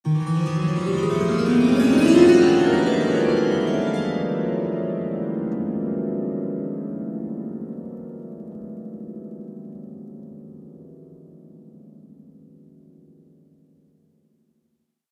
piano harp remix
piano harp band filtered sample remix
piano-harp, remix, transformation